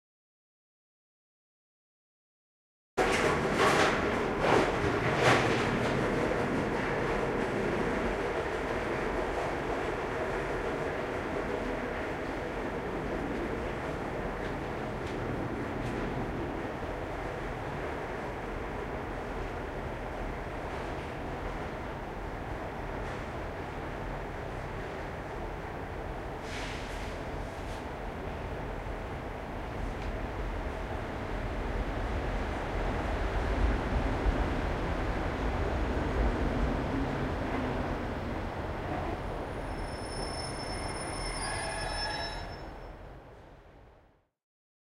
augo8 platform trolley
General station ambience and train sounds at Doncaster station in Yorkshire, England.
field-recording, platform, train